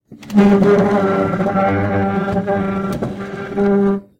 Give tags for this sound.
Ceramic
Drag
Dragged
Kitchen
Monster
Pull
Pulled
Push
Pushed
Roar
Snarl
Stool
Tile
Wood
Wooden

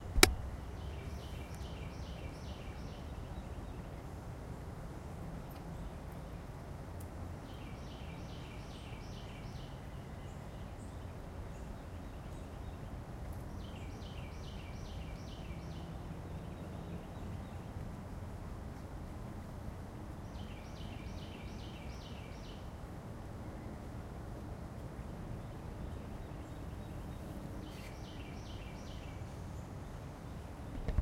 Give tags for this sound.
ambiance
ambient
bird
birds
field-recording
Florida
nature
traffic
Wetlands

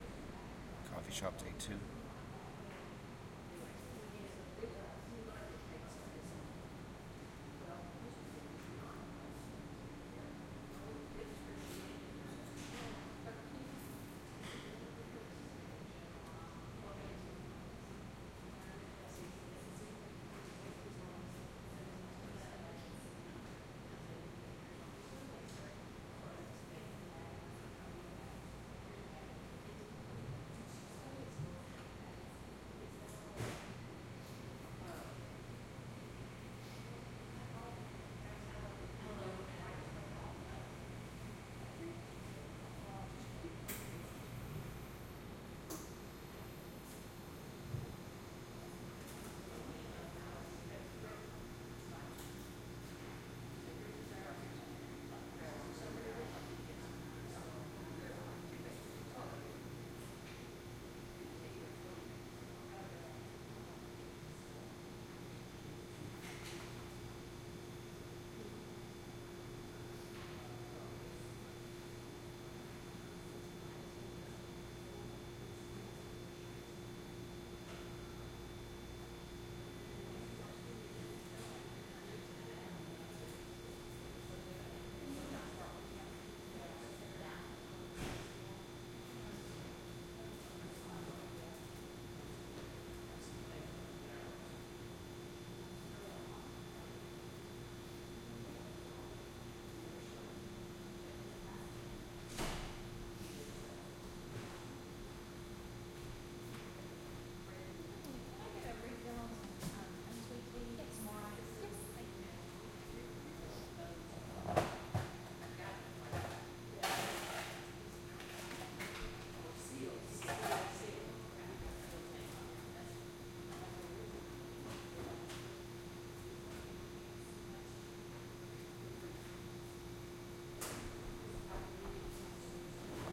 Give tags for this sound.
2
6
ambience
ambient
atmosphere
barista
chatter
Coffee
customers
entering
field-recording
low
movement
MS
noise
People
refrigerator
Shop
soundscape
STEREO

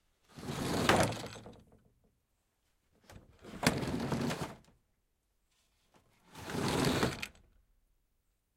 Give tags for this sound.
sliding
wooden

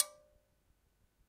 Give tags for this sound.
experimental
metallic
percussion

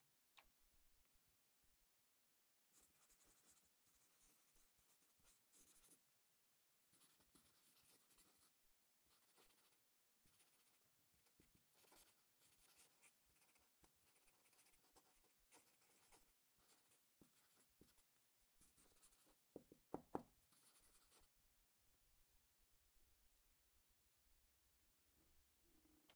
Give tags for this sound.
pen
writing
pencil
scribbling

